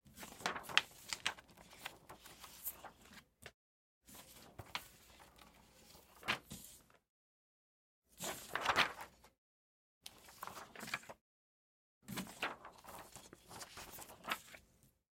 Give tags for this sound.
projector; transparencies; overhead; sift

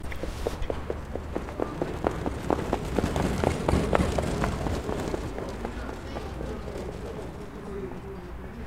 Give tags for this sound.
hippodrome; race; horse; racetrack

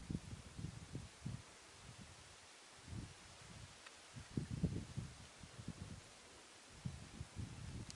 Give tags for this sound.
sound; island

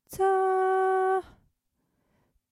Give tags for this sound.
female; sing

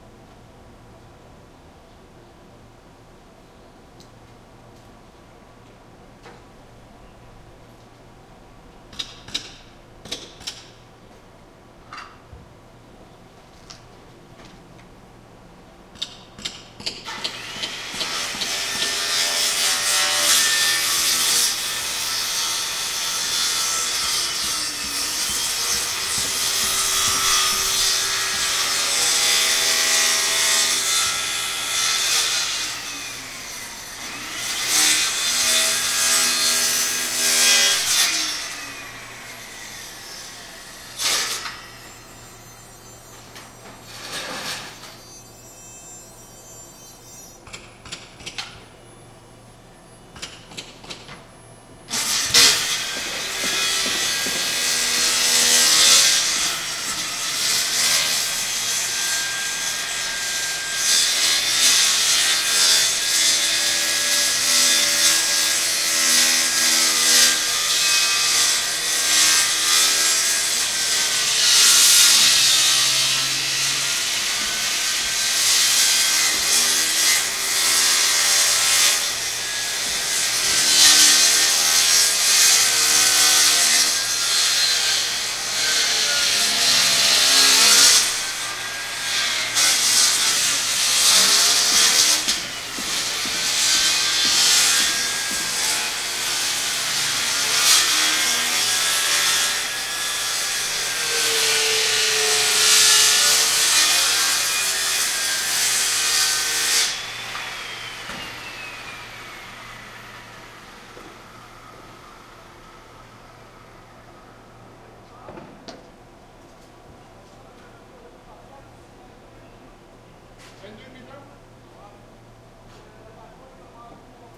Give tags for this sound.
sawing; trim; alley; grinding; metal

